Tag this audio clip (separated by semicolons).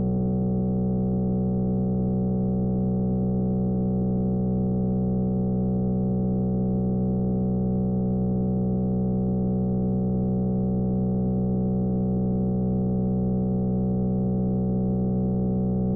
buzz; electric; electricity; electro; electronic; hum; magnetic; noise; power; substation; transducer; transformer